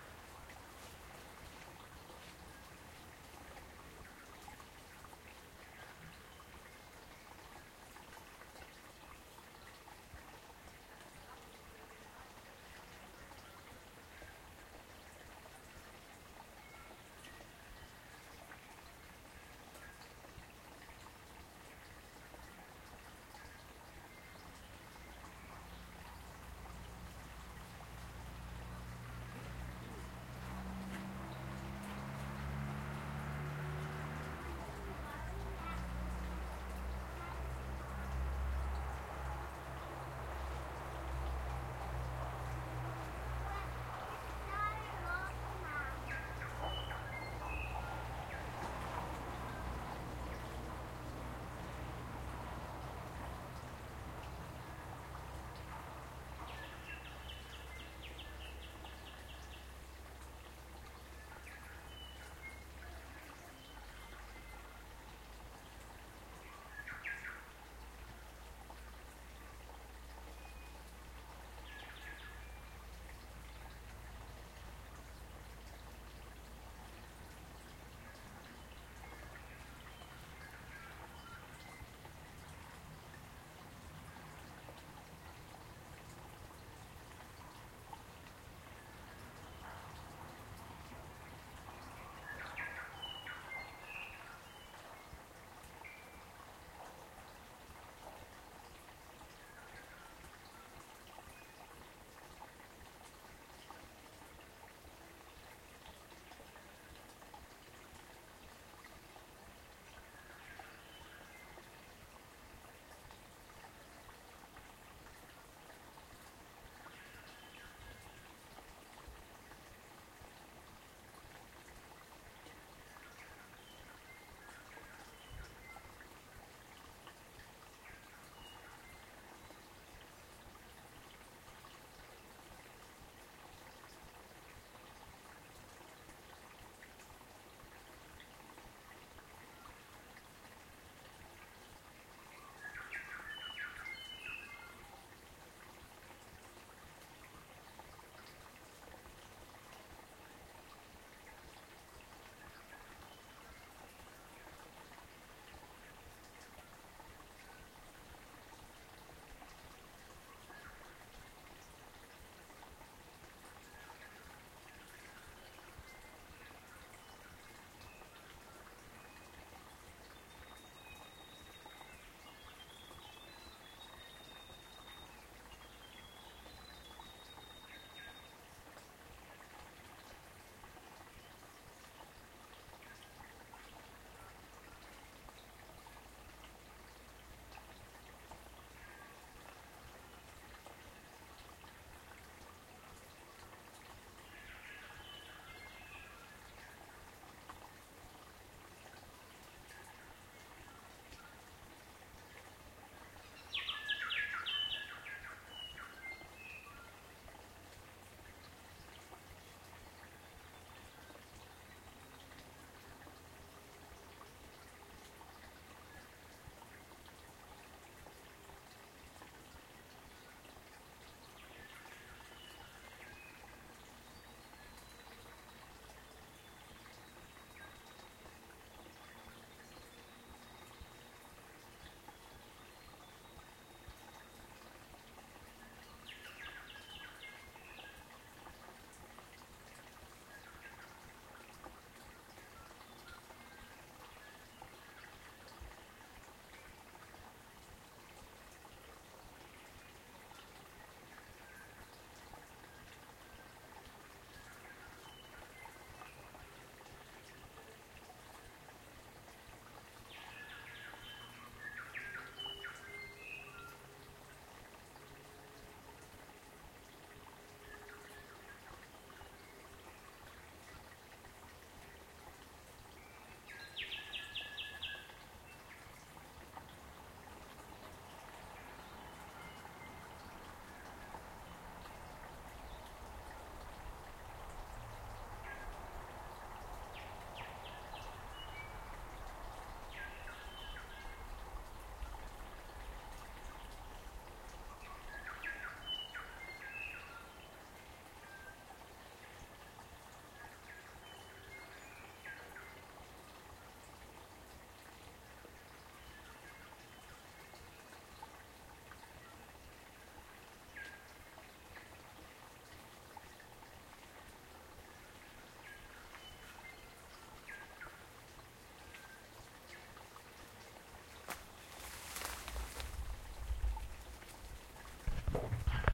forest birds
I took this recording at Peel Forest, New Zealand with birds singing in the background next to a 'babbling brook'.